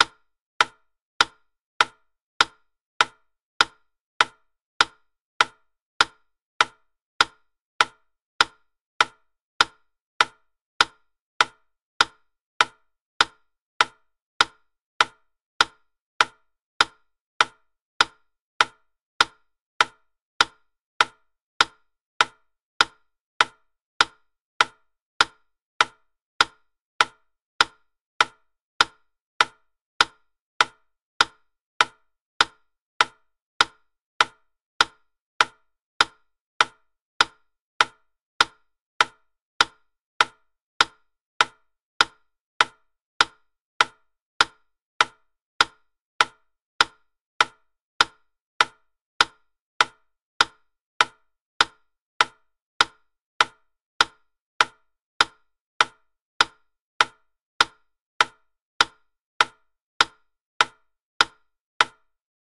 Wittner 100 BPM
Wooden Wittner metronome at 100 BPM, approx 1 minute duration.
tick-tock, 100-bpm, wittner-metronome